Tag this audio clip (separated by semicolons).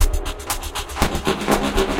dubspace; dub; deep; 60-bpm; loop; space